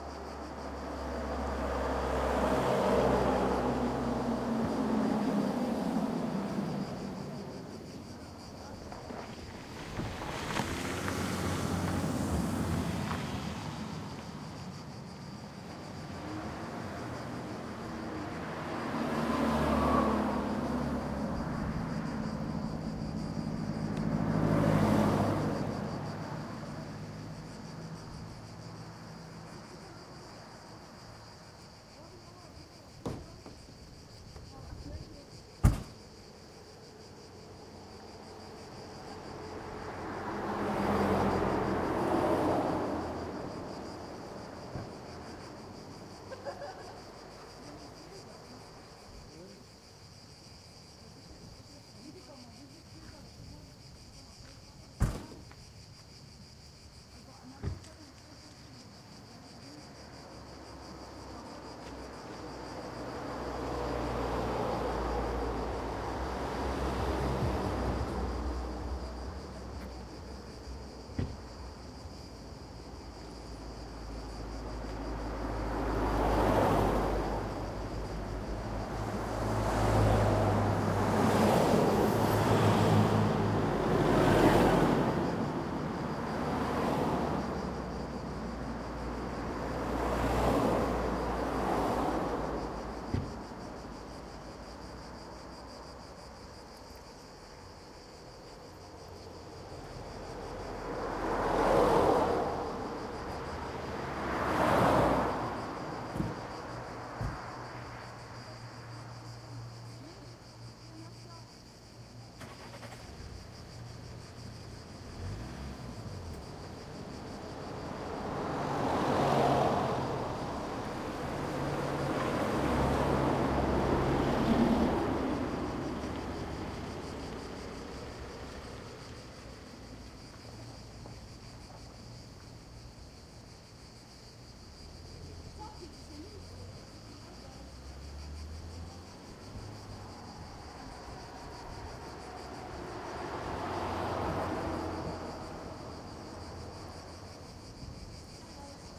4ch surround recording of a rest area on the Jadranska Magistrala, a coastal road in Croatia near the town of Ploce. It is early afternoon, voices of people on the rest area can be heard, predominantly those of a group of young Slovenian tourists. Cars passing on the road nearby can be heard in the midrange.
Recorded with a Zoom H2. These are the FRONT channels of a 4ch surround recording, mic's set to 90° dispersion.